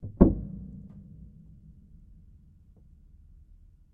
Upright Piano Noise 12 [RAW]
Some raw and dirty random samples of a small, out of tune Yamaha Pianino (upright piano) at a friends flat.
There's noise of my laptop and there even might be some traffic noise in the background.
Also no string scratching etc. in this pack.
Nevertheless I thought it might be better to share the samples, than to have them just rot on a drive.
I suggest throwing them into your software or hardware sampler of choice, manipulate them and listen what you come up with.
Cut in ocenaudio.
No noise-reduction or other processing has been applied.
Enjoy ;-)